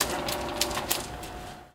03 - Turning off projector
Turning off a 16mm projector - Brand: Eiki
Apagado de proyector de 16mm - Marca: Eiki
16mm, projector, field-recording